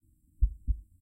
A dragon or monster heartbeat